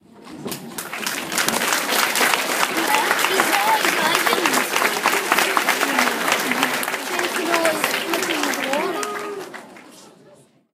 Large crowd applause sounds recorded with a 5th-gen iPod touch. Edited in Audacity.
applause, cheer, clap, clapping, crowd, people